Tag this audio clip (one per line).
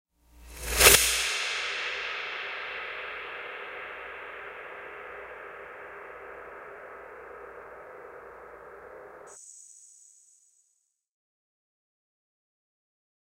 processed
mechanical